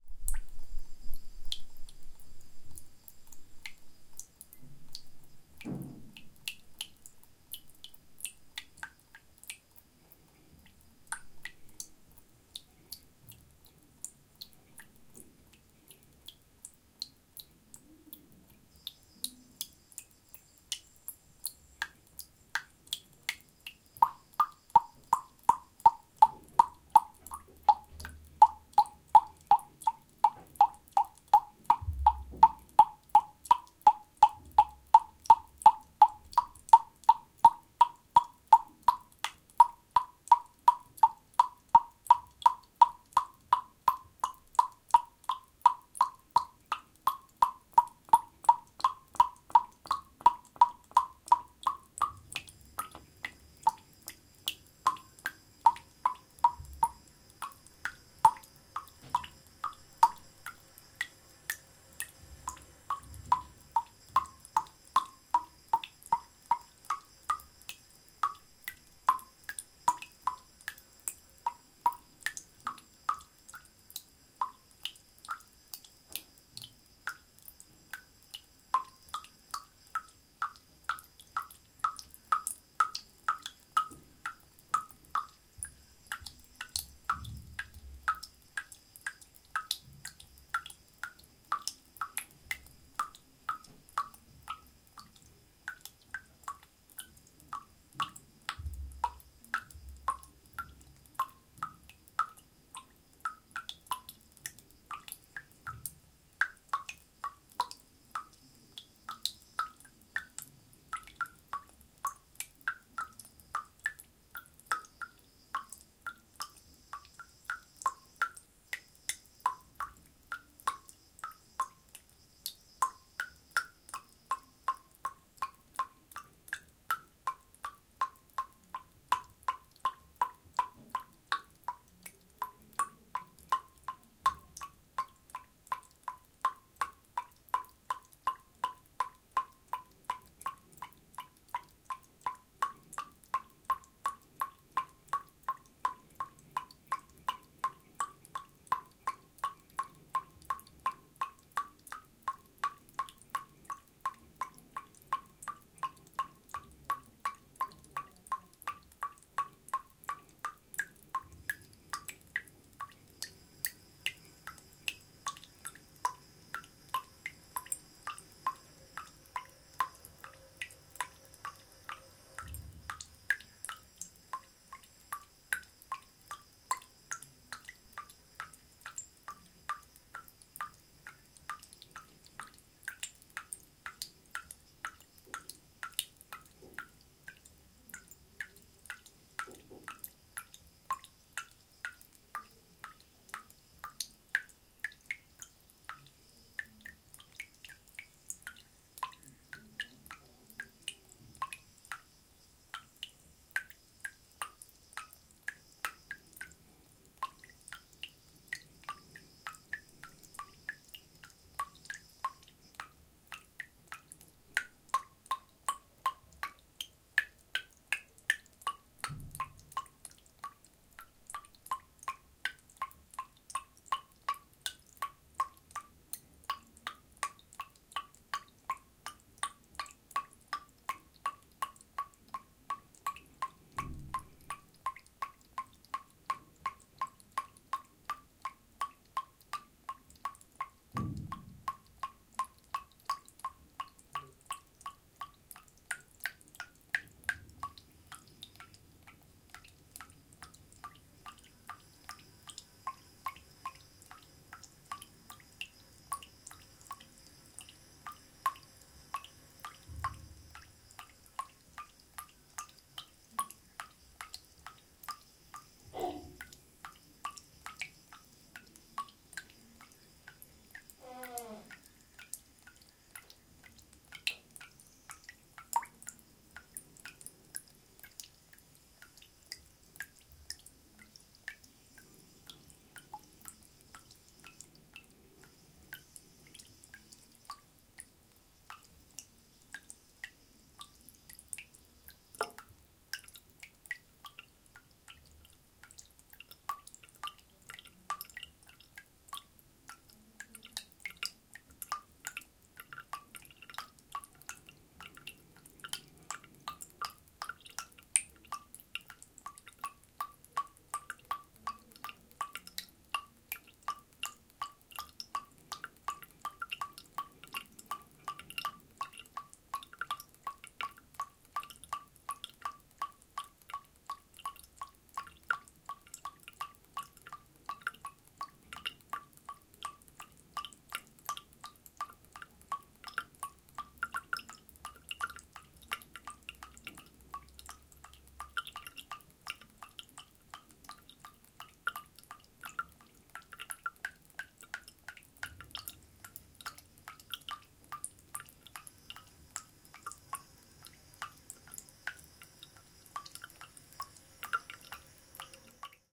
Tap water glass medium drip-20120409-233646
Tap water dripping at medium speed into a glass, from empty to full. Recorded with Tascam DR-40 internal mics Stereo X-Y.
glass, water, drip